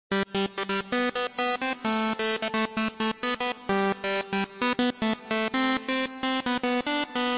90's simply synth dance melody
s, loop, electronic, melodic, techno, 90, synth, dance, melody, trance